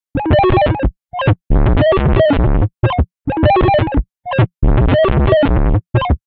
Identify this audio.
atlas3-2x-16bit
Another clip from my Large Hadron Collider sonifications. This one is post-processed for extra shizzle.
physics, sonification, hadron, glitch, large, proton, collider, atlas, lhc, experiment